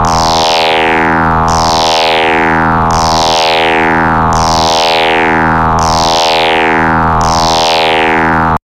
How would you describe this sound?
quantum radio snap103
Experimental QM synthesis resulting sound.
noise, drone, sci-fi, experimental, soundeffect